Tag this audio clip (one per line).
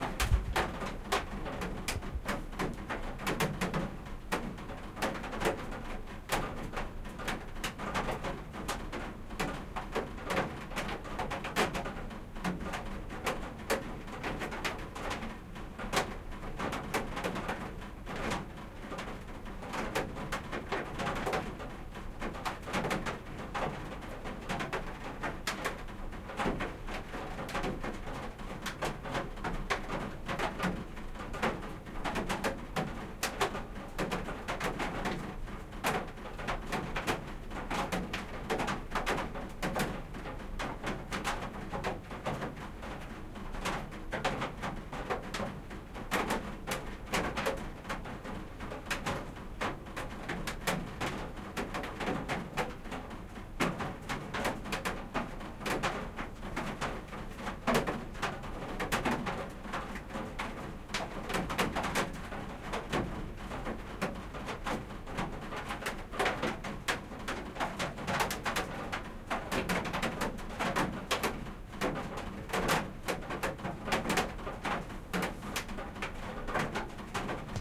metal
raindrops
Rain